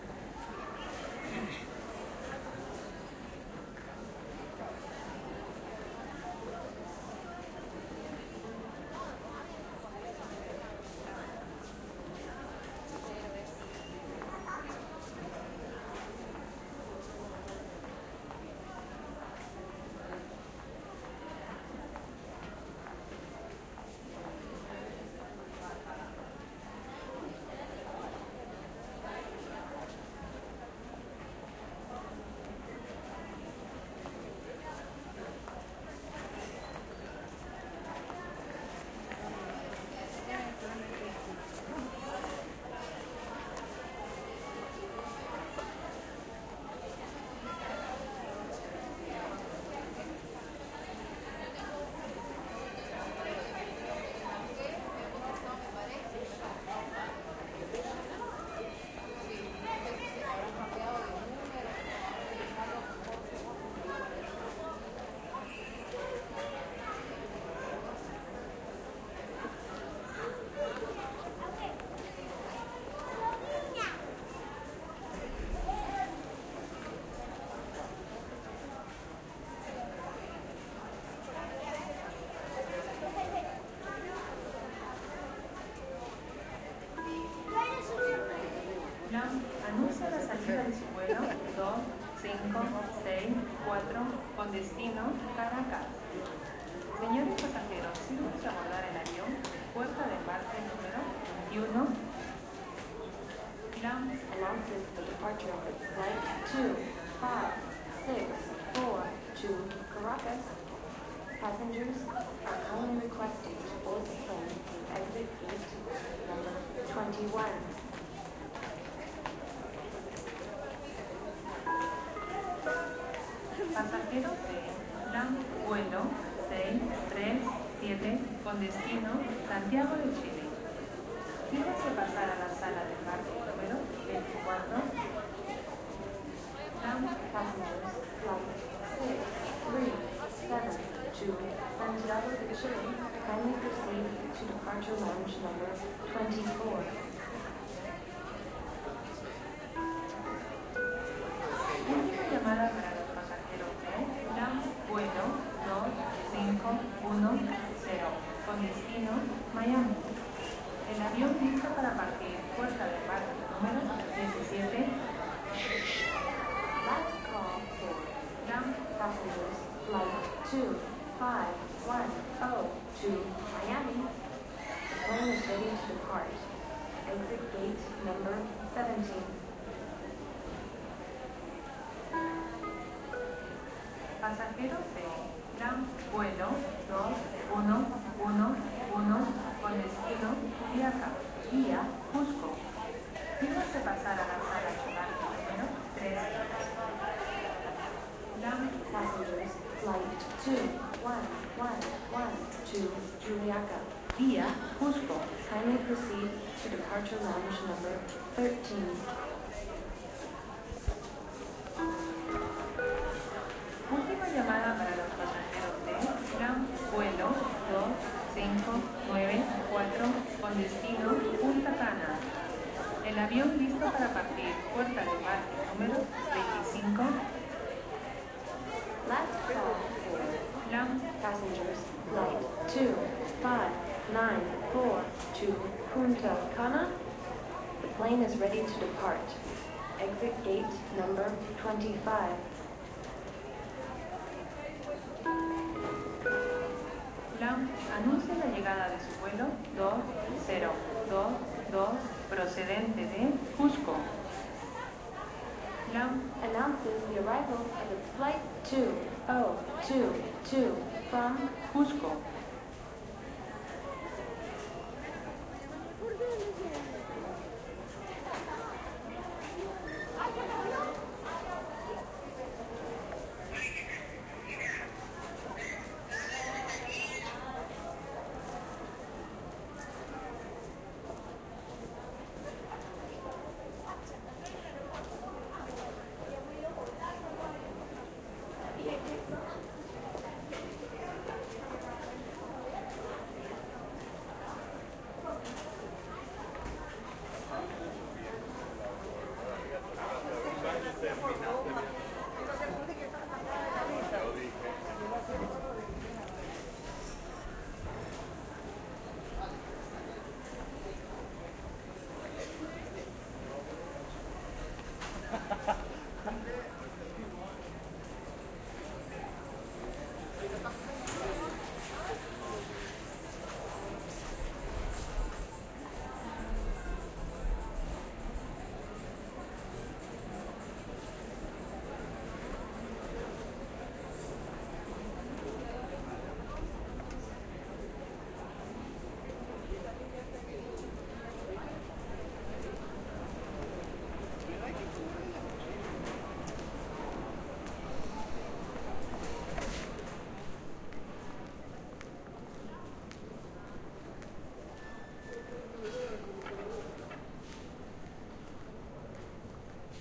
1 Aeropuerto de Lima - Llegadas Internacionales
About 6 minutes at Lima´s Jorge Chavez International Airport arrival zone. Recorded with a handheld Tascam DR7.
airport, ambience, flight-announcements